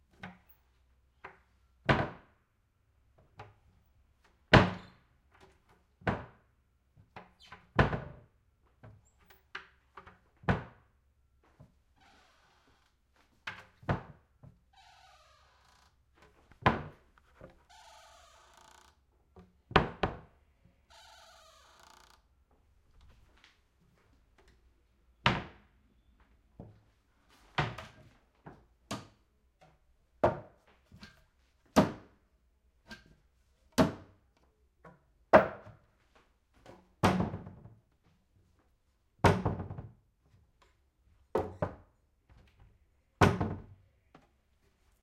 door wood cabinet or kitchen cupboard open close various bounce creak and catch little plastic holder thing
bounce, cabinet, close, cupboard, door, kitchen, open, or, wood